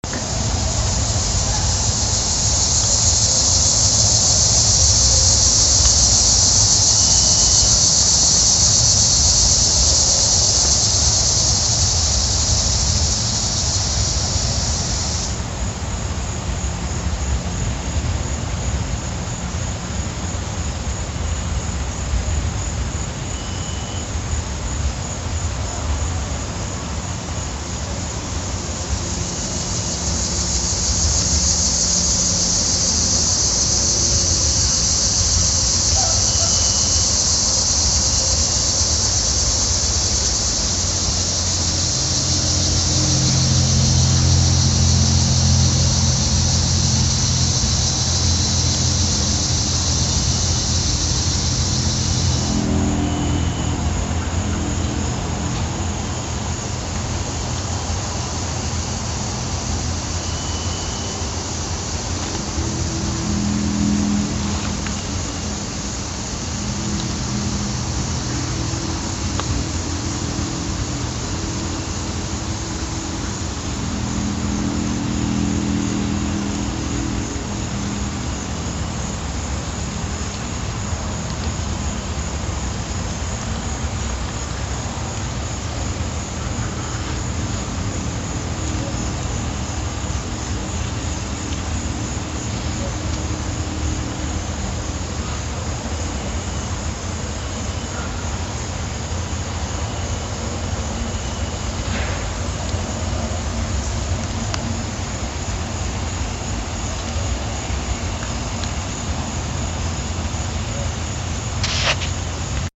Forest Background Noise
Gentle background noise of a forest. Some leaves rustling and crickets going crazy, at one point a rooster from the neighbors yard crows. Edited out a small amount of car noise.